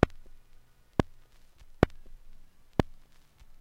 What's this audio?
Clicks and pops recorded from a single LP record. I carved into the surface of the record with my keys, and then recorded the sound of the needle hitting the scratches. The resulting rhythms make nice loops (most but not all are in 4/4).
analog, glitch, loop, noise, record